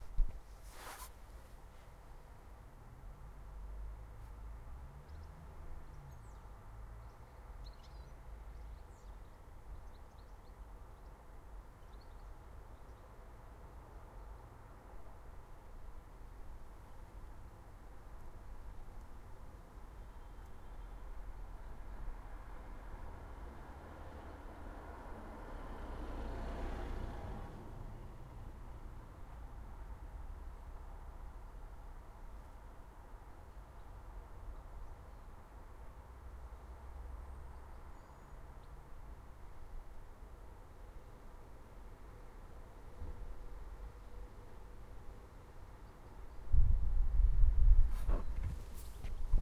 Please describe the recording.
Urban atmosphere from inside parked car with windows open. Car drives by.